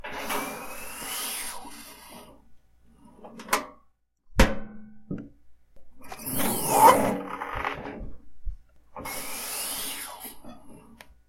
Opening and closing sound effects of a Sci-Fi door or airlock. Enjoy!